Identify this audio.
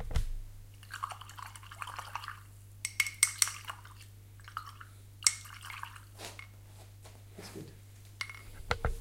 Paintbrush being cleaned in a jar - faster version
paintbrushes, painting, jar